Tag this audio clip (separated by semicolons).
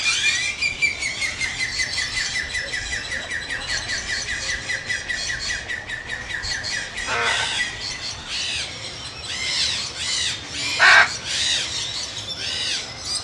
zoo; birds; parrots; tropical; aviary; jungle; macaw; rainforest; conure